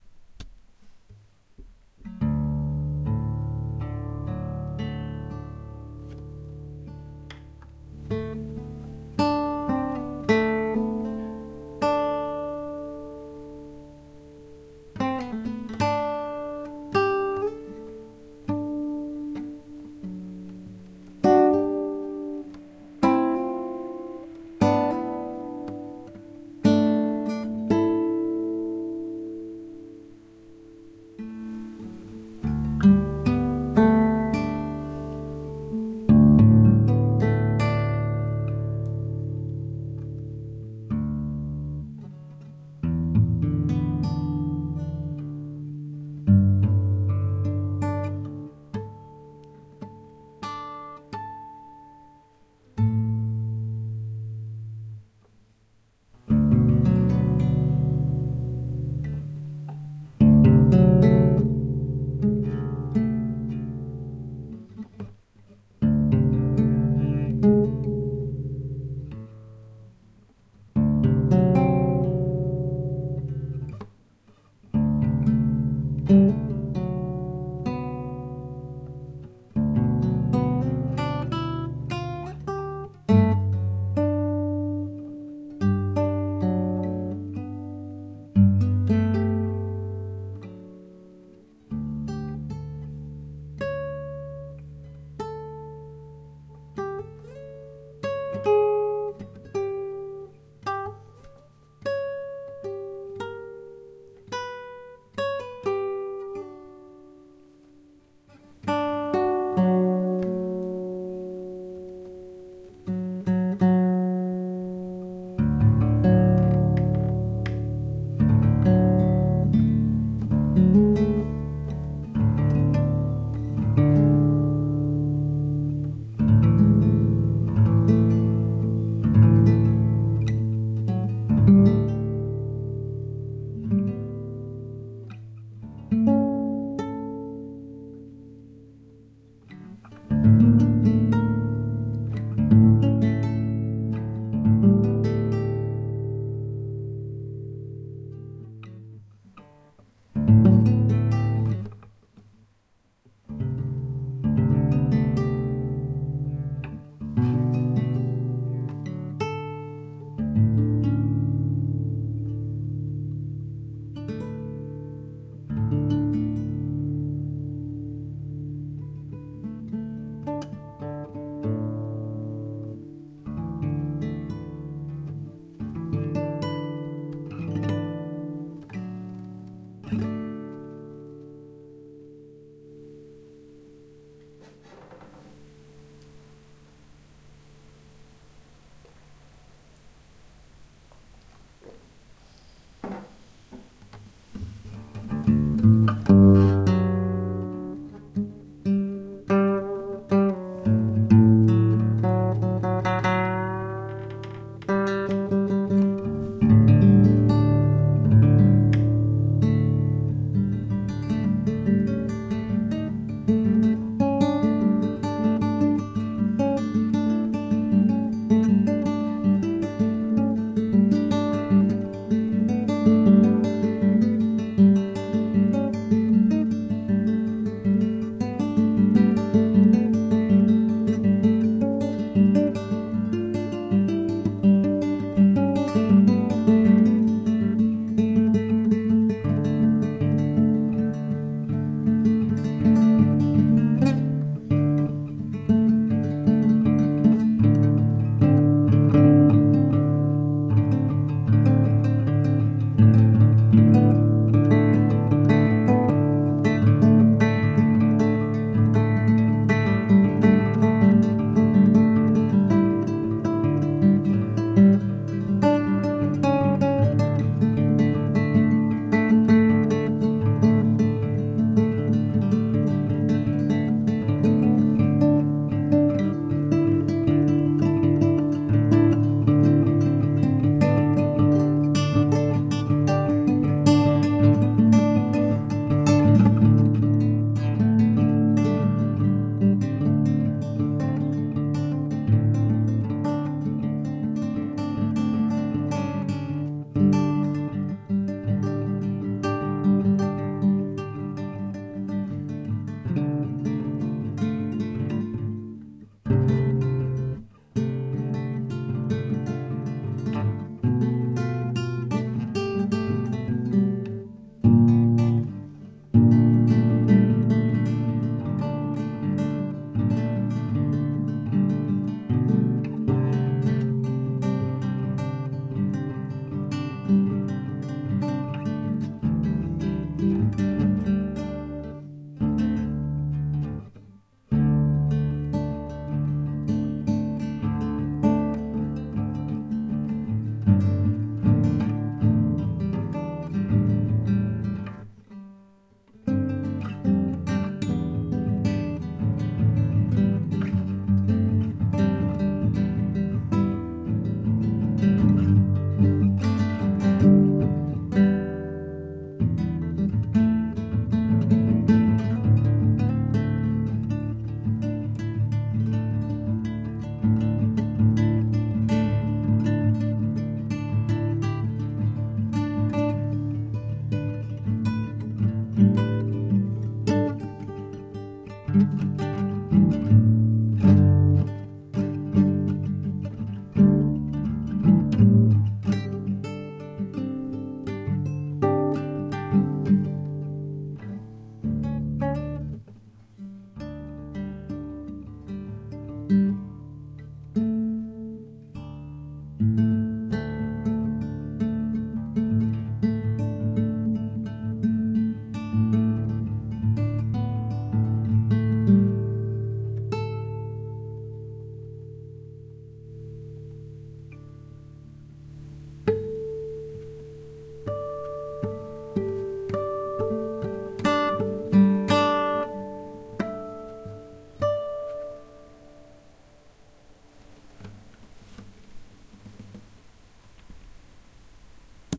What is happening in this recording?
BCO - Guitar - 2021-06-04
Nylon string, acoustic guitar in open tuning.
acoustic, clean, C40, Yamaha, guitar, nylon